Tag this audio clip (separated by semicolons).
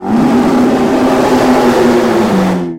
dinosaur; monster; roaring; scream; breath; huge; creature; shout; dragon; big; growl; t-rex; roar; dino; evil; screaming